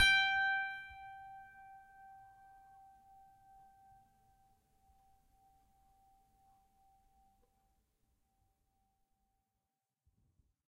a multisample pack of piano strings played with a finger